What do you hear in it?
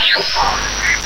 Mute Synth Amplified Noise 001
Another one from the Mute-Synth.
Please see other samples in the pack for more about the Mute Synth.
When listening back to my recordings of the mute synth, in some sections I find some scratches noises. Usually they sound like little more than low volume white noise, but when amplified they can actually have considerable complexity and interest.
I have named all sounds of this type 'amplified noise'